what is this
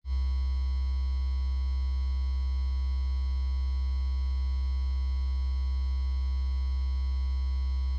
electric hum
An electrical hum with buzz. Created using Helm synth.
Have a sound request?
appliance, buzz, drone, electric, electricity, hum